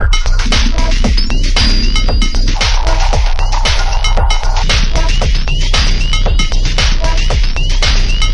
115 BPM STAB LOOP 25 mastered 16 bit
I have been creative with some samples I uploaded earlier. I took the 'STAB PACK 01' samples and loaded them into Battery 2 for some mangling. Afterwards I programmed some loops with these sounds within Cubase SX. I also added some more regular electronic drumsounds from the Micro Tonic VSTi.
Lot's of different plugins were used to change the sound in various
directions. Mastering was done in Wavelab using plugins from my TC
Powercore and Elemental Audio. All loops are 4 measures in 4/4 long and
have 115 bpm as tempo.
This is loop 25 of 33 with an experimental feel in it and some nice special effects.
115bpm, dance, drumloop, electronic, loop, weird